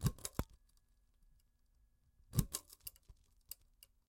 turning on a lamp